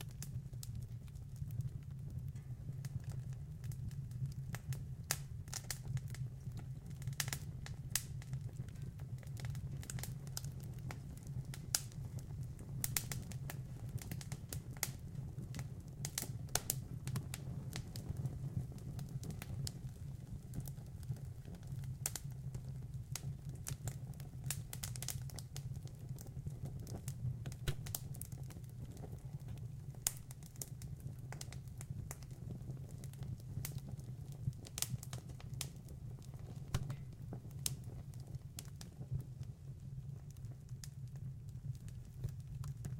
fire small loop
Small sized fire (seamless loop)
burn, burning, fire, loop, seamless, small